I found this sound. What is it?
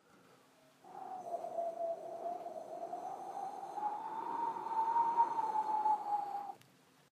Sonido de viento